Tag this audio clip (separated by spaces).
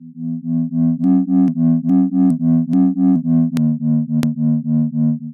noise waves